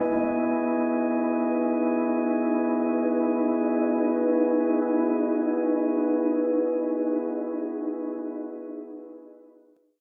A warm bell tone generated in CoolEdit with reverb and slight delay added. Fade-out.
pad, soundscape, mellow, layered, spacey, background, processed, ambience, bell, effect, light, bell-tone, airy, fade-out, fade, cinematic, atmospheric, synth